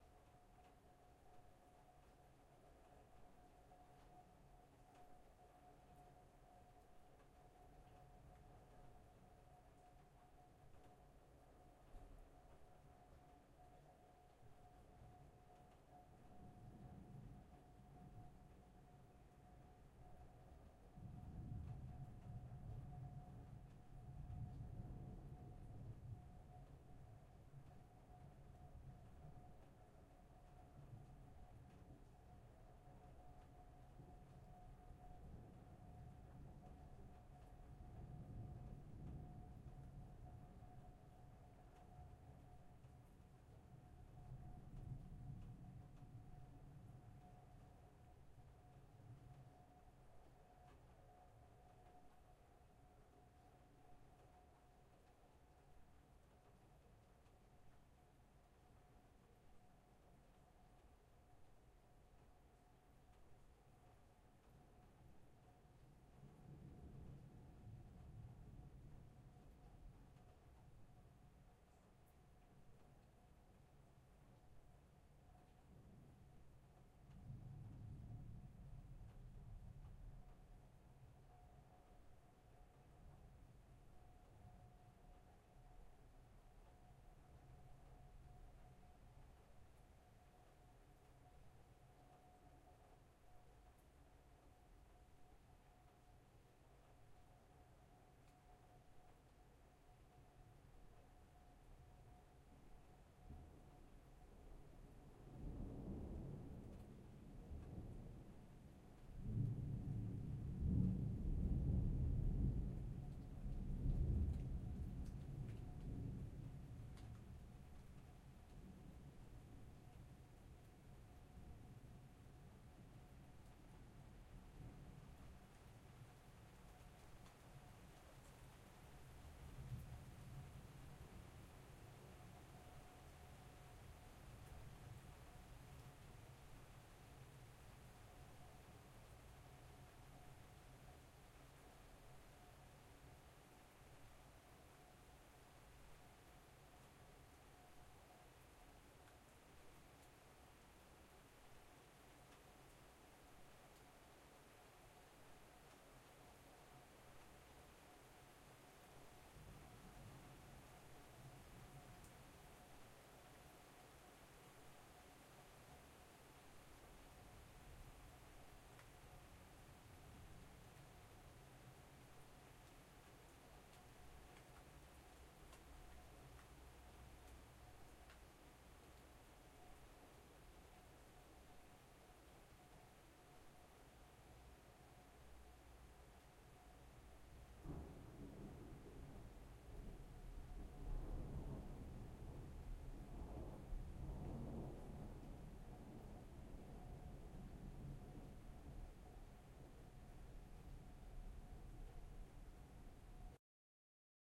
WEATHER-RAIN, CHANGE-Small dripping changes to gentle rain-0001
Weather sounds recorded in Tampere, Finland 2012. Rain, thunder, winds. Recorded with Zoom H4n & pair of Oktava Mk012.
rain, change, field-recording, dripping